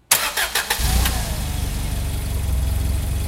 Forklift Engine Start 2
engine, medium, high, motor, low, Mechanical, Factory, Machinery, machine, Industrial, Buzz, electric, Rev